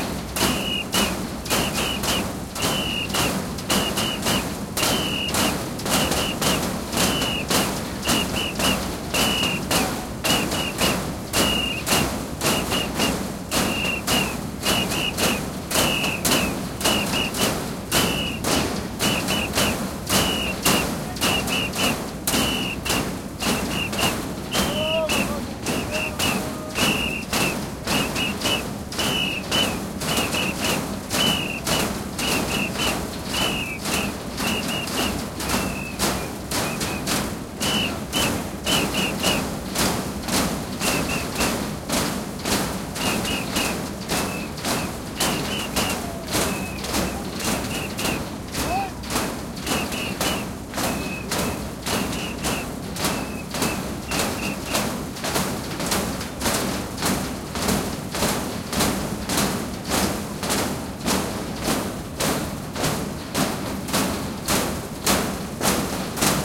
Protests in Chile 2019. Protesters hitting great wall during demonstrations in Chile. year 2019